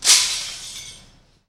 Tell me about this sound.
glass06-proc
Glass smashed by dropping ~1m. Audio normalized, some noise removed.
field-recording, smash, broken, glass